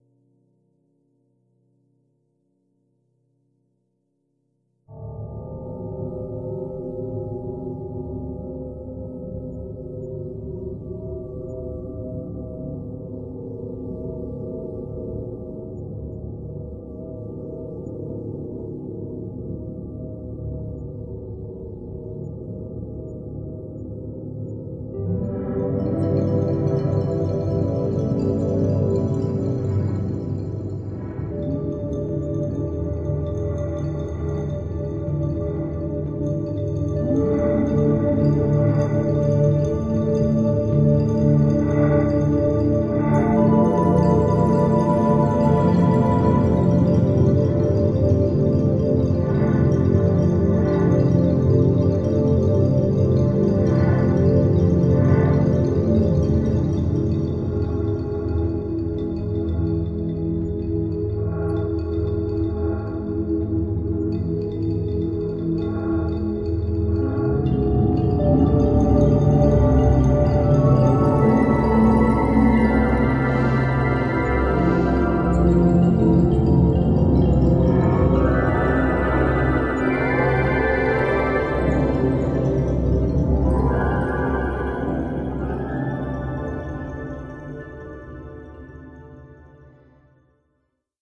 Soundscape Breathless 02
Made with Roland Jupiter 80.
soundscape, synth